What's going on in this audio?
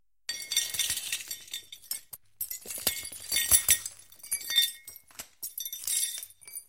Rain vidrio
glasses, falling, glass